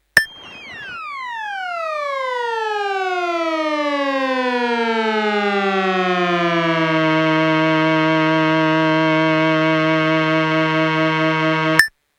cool casio sk-1 effect when you hit the loop set button after pressing and holding a key... vibrato should be on and use a sample (no preset)